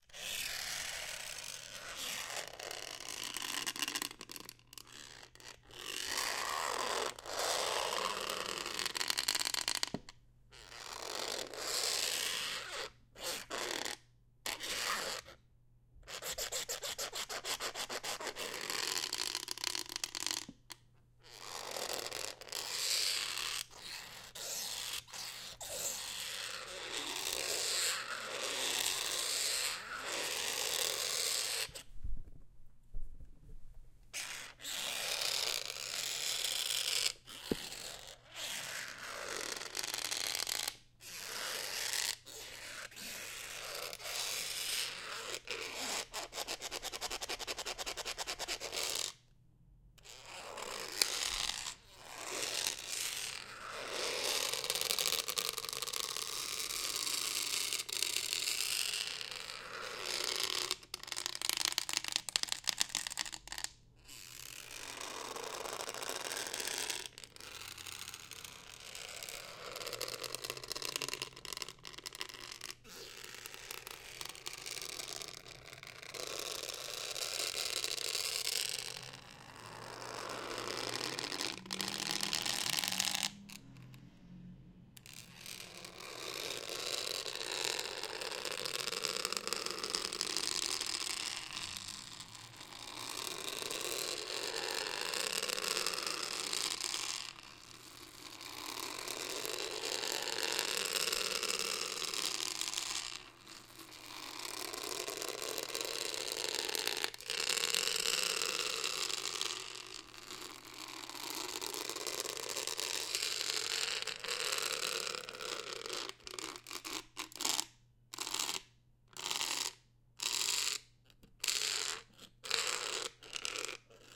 Foley recording of a goose-neck reading lamp lamp (you can bend it into any position and it will stay that way) mounted to a bed in a hotel room. Features a lot of groaning, clicking, bending, stretching, etc. Could be used for rope torsion sfx, pitched down for metal stress / strain / fatigue, clicking elements could be used for creature sounds.